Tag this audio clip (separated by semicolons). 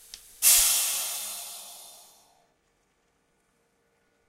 slips; release; mechanical; pressure; oil; compressed; air; industrial; rig